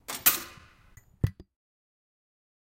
The offering part of mass